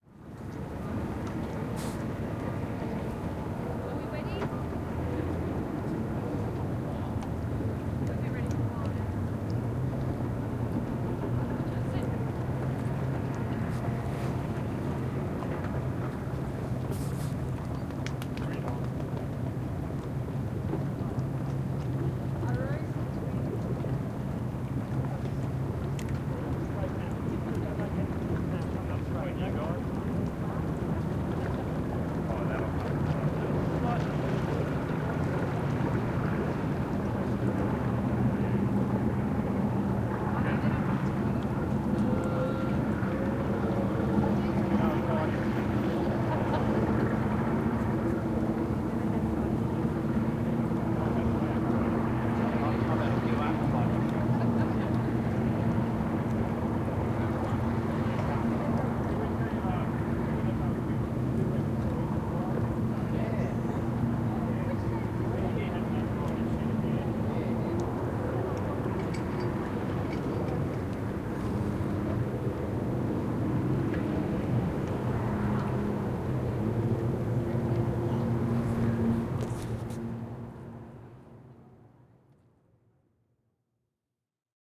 Boat Ramp - Recording from 68 meters 2
This is a bit of an experiment I was recording from a pontoon 68 meters across water to a boat launching ramp.
boat-ramp, sea, field